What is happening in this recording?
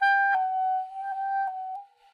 A G-F# trill on the alto sax.
sax, trill, smith, howie